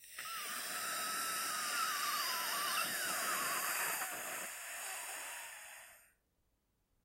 dying monster
creature, Zombie, death, horror